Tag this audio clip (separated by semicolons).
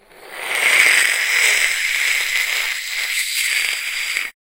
mouth; tooth; teeth; gnash